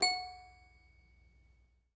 This lovely little toy piano has been a member of my parent’s home since before I can remember. These days it falls under the jurisdiction of my 4-year old niece, who was ever so kind as to allow me to record it!
It has a fabulous tinkling and out-of-tune carnival sort of sound, and I wanted to capture that before the piano was destroyed altogether.
Enjoy!
Carnival, Circus, packs, Piano, sounds, Toy, toy-piano